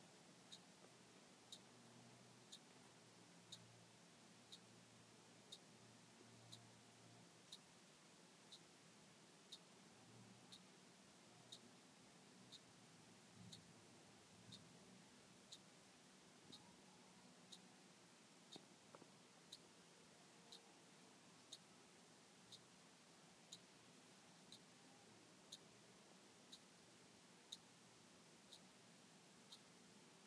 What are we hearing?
Ticking Clock
A recording of a wristwatch with a very loud tick
Clock, tick, wristwatch